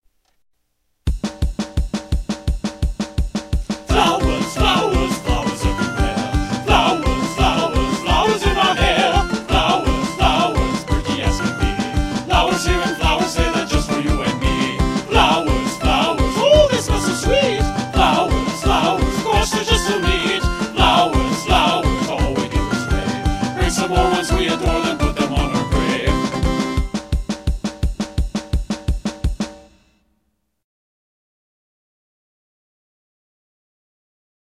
Flowers Flowers (goofy song)
A quirky weird song of dead people singing about flowers. Maybe good for a musical about zombies? ha! I did all the singing voices and also I created the music with my Yamaha keyboard. Mixed it on my roland vs-840
funny, goofy, humor, jolly, joy, laugh, laughter, short, song